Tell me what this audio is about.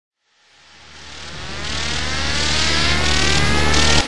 this series is about transition sfx, this is stacked sound effects made with xsynth,dex and amsynth, randomized in carla and layered with cymbal samples i recorded a long time ago
crash, cymbal, hit, impact, noise, riser, sfx, transition, white, woosh